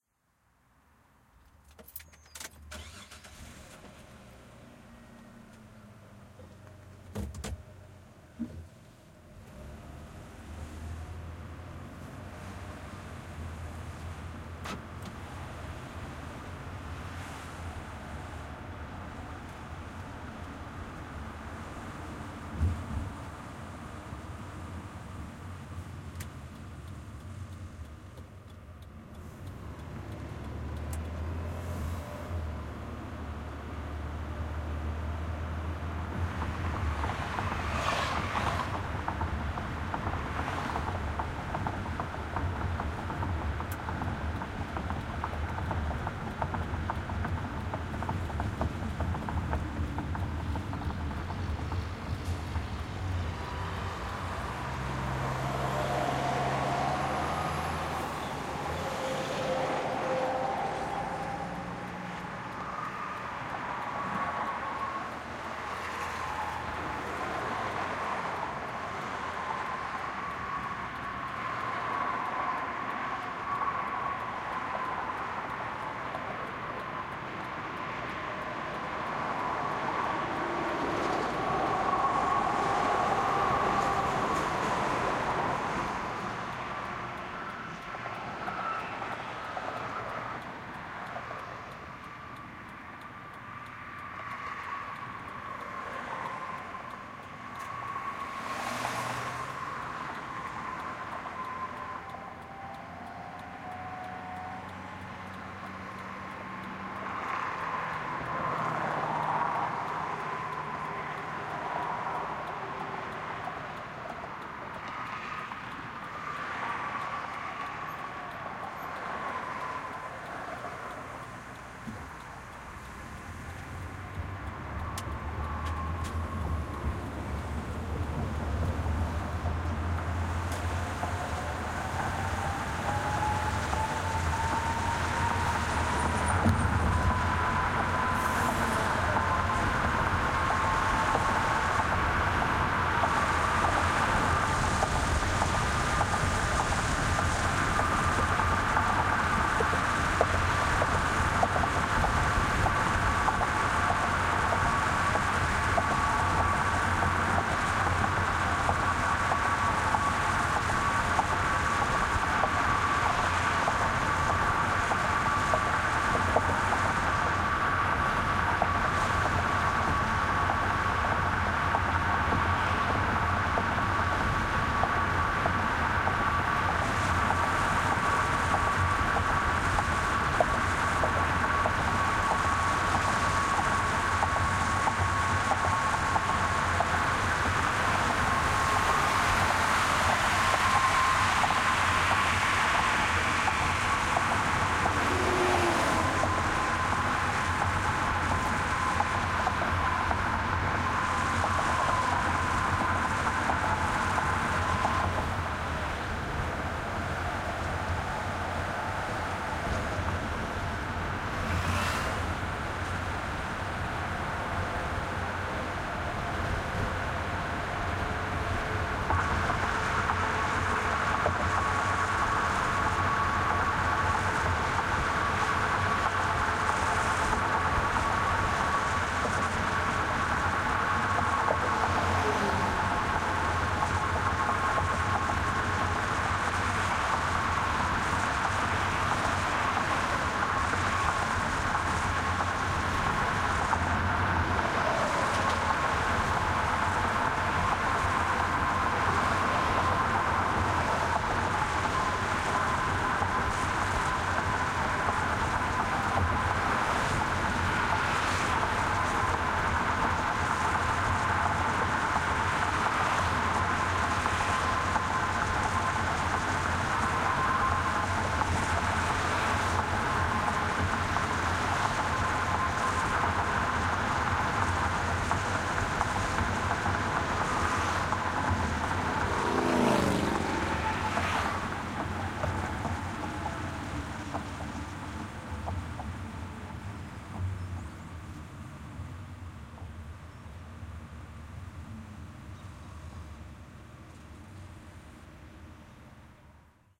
Driving in Streamwood IL with the windows down (05-04-2009)
An excerpt from some in-car driving recordings I made with the windows wound down. My starting point is geotagged. I first turn right out of Trail Ridge Court in Streamwood, IL, then wait to turn left at the intersection of Schaumburg Road and South Sutton Road. I then head north on IL Route 59. The car is a 1996 Chrysler LHS 3.5L V6 (note the recognizable sound of the creaky Chrysler transmission as I slow to a stop). My recording setup was two Audio Technica AT851A boundary mics, mounted upside down on the interior roof and facing out of the driver and passenger windows (with appropriate wind protection), running through a mixer into a Zoom H4.